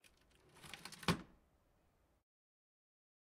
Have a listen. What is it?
Drawer dresser oc distant-004
Dresser Drawer open close recorded from distant with room tone.
Dresser, Open, Close, Drawer, Door